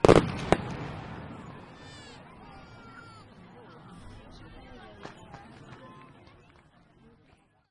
fireworks impact9
Various explosion sounds recorded during a bastille day pyrotechnic show in Britanny. Blasts, sparkles and crowd reactions. Recorded with an h2n in M/S stereo mode.
blasts, bombs, crowd, display-pyrotechnics, explosions, explosives, field-recording, fireworks, pyrotechnics, show